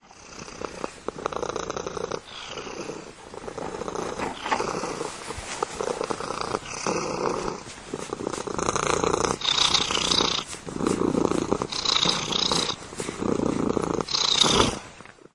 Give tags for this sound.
making; sounds; my; cat; purrig; fia; purring

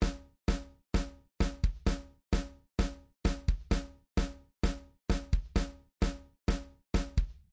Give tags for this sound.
Fl; rythm; syncopation

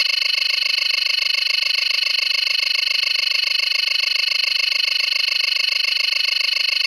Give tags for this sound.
simple; text; long; futuristic; osd; film; scifi; beep

